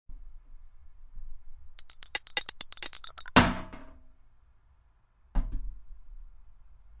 Bell, ringing, ring
Bell, ringing